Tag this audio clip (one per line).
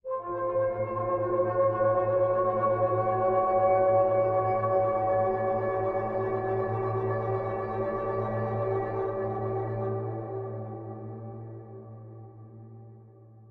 terror
spooky
horror
thrill
sound
dark
violin
ambient
sinister
creepy
strange
scary
weird